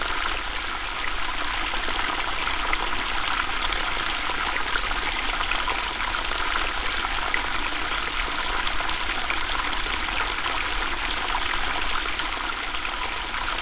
water stream
Birds, around noon.
ambiance, ambience, ambient, atmosphere, babbling, brook, bubbling, close, creek, field-recording, flow, flowing, forest, gurgle, gurgling, liquid, meditative, nature, relaxation, relaxing, river, shallow, soundscape, splash, stream, trickle, water, woodlands, woods